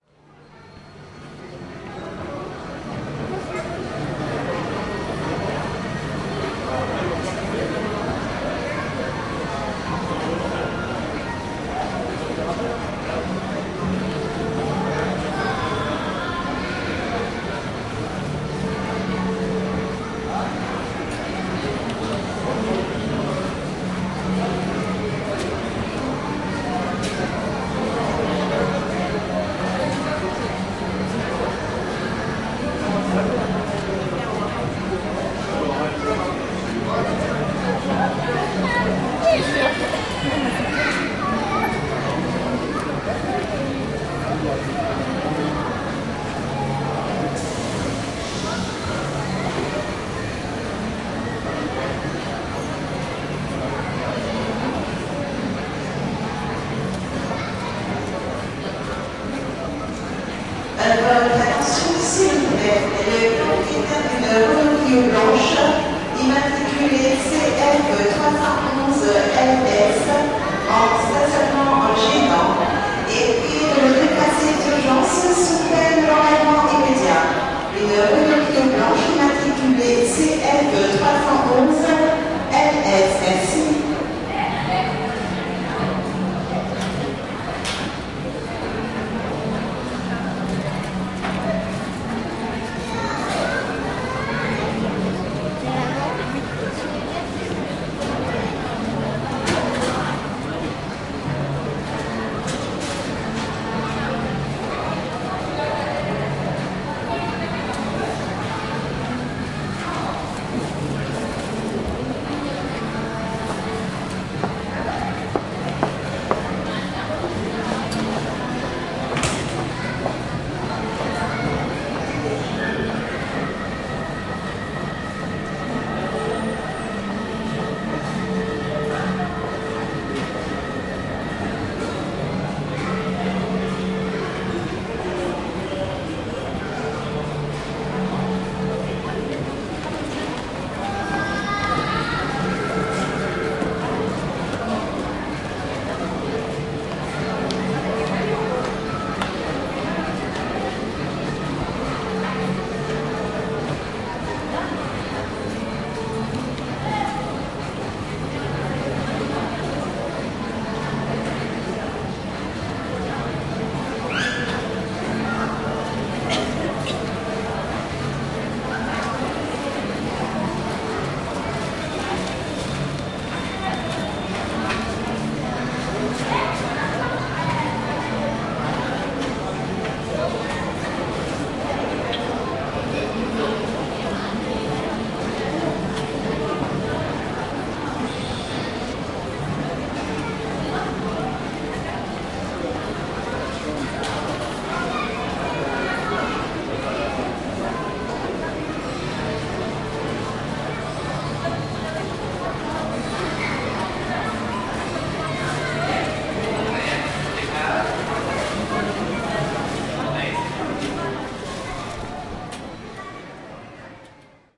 Field recording of a shopping mall in a Parisian suburb. Quiet day, people passing -by, fragments of conversations in french, Muzak playing in the background. Annoucement in french for a misparked car. Recorded with a zoom h2n.
shopping-mall, field-recording, France, crowd, centre-commercial